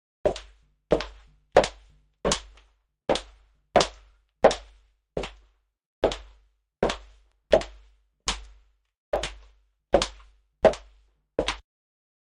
footsteps (Streety NR)
A cleaned version of Streety's Footstep file.I did not use the normal NR application (since that sometimes leaves a nasty phasing sound). I wanted to leave the footsteps as clean as possible, so I first put it through a NOISE GATE. Since the gate left a little hummy tail on the end of each step - I just selected the last part of each step and applied an aggressive -46db notch with a Paragraphic EQ between 125Hz and 250Hz. I wouldn't want to use that on the whole sound... just on the last bit of each one.I think it took care of most of it.SoundForge8
environmental-sounds-research, hard-surface